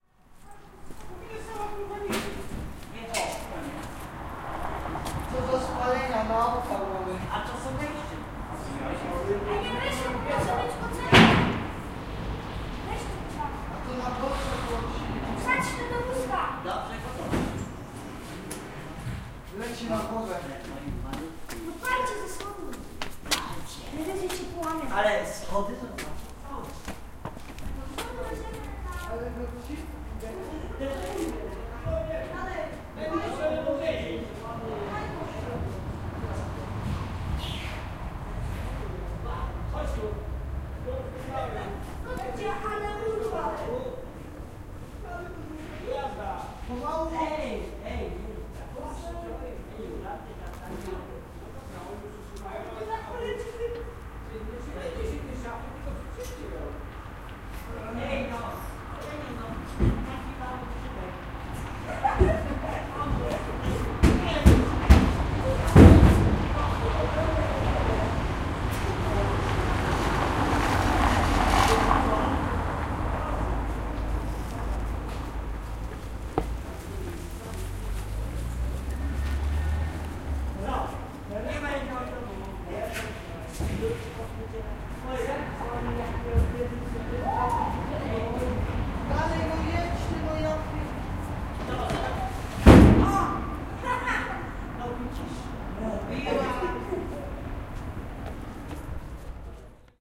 scrap metal collectors 170411
17.04.2011: about 22.30. Saint Jerzy street in Wilda district in Poznan. scrap metal collectors gathering metal and wooden things inside the tenement corridor.
voices, cellphone, poznan, hit, recording, street, noise, tenement, field, strike